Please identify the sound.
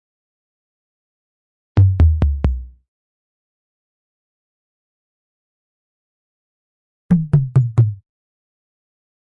ANALOG DRUM BEATS DOVES 1
indian, gener, analog, drums